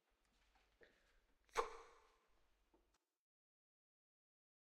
Blowing-out-the-candle
Blowing out the candle, or the sound!
Voice-recording, simple, Reverb